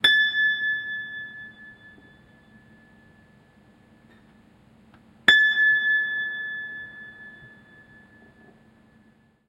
Piano C6 2 keer
In the rehearsal room stands a very detuned piano, the mechanism is out, I plucked the strings and recorded a couple. Here C6 string plucked. Recorded with Sony PCM D50.